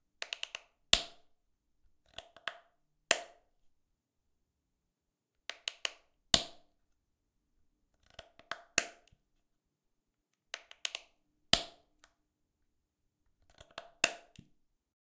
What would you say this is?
open closing bottle
Open and closing a plastic bottle with a clicking lid. Recorded with AT4021 mics into a modified Marantz PMD661.
bottle, plastic, click, foley, snap